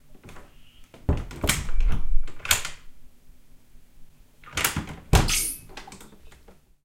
Open and close door
A bathroom door closing and locking. Then unlocking and opens again. Recorded with a Zoom H5 in my house.
lock, locking, unlock, door